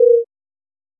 GUI Sound Effects 052
GUI Sound Effects
botton, interface, GUI, fx, Sound-Effects, effects